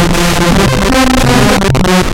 circuitbent Casio CTK-550 loop4
bent, circuit, loop, sample